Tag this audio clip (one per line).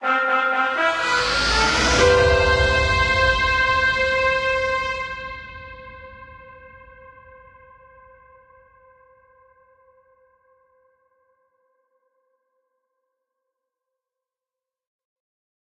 Achievement
Level
Quest-Complete
Succes
Up
Winner